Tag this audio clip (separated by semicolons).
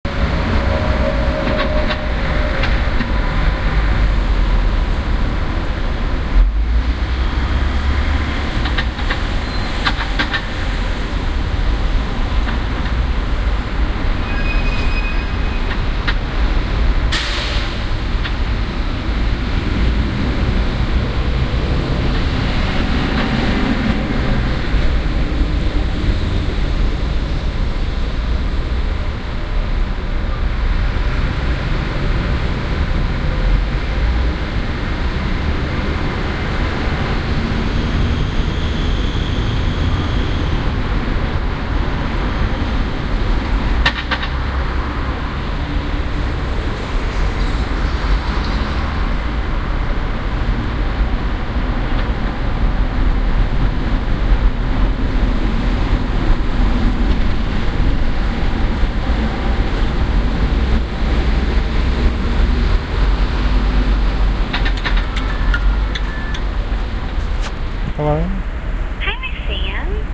ambiance; ambient; soundscape; ambience; city; atmosphere; general-noise; london; background-sound; field-recording